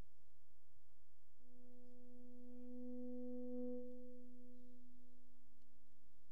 Feedback recorded from an amp with a guitar. Makes an eerie hum and can be taken strangely out of context. One of several different recordings.
noise, feedback, guitar, tone, hum, amp